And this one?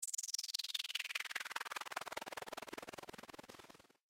falling stars efx d
White noise processed with a descending bandpass filter.